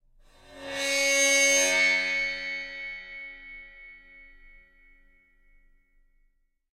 Cymbal recorded with Rode NT 5 Mics in the Studio. Editing with REAPER.